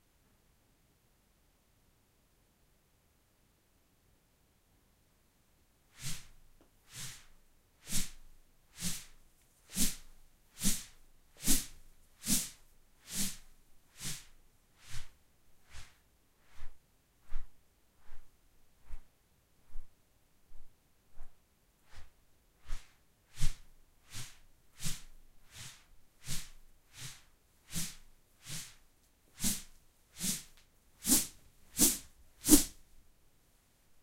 This is the raw version, I also uploaded a denoised one.
I swang a straw broom.